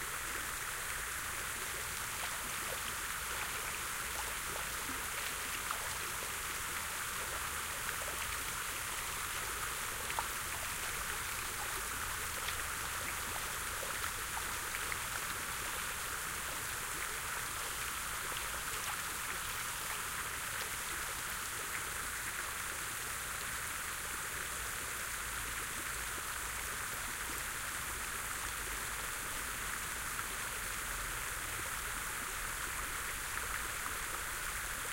main street fountain
field-recording, liquid